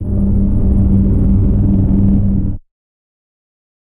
Broken Transmission Pads: C2 note, random gabbled modulated sounds using Absynth 5. Sampled into Ableton with a bit of effects, compression using PSP Compressor2 and PSP Warmer. Vocals sounds to try to make it sound like a garbled transmission or something alien. Crazy sounds is what I do.
ambient, artificial, atmosphere, cinematic, dark, drone, electronic, evolving, experimental, glitch, granular, horror, industrial, loop, pack, pads, samples, soundscape, space, synth, texture, vocal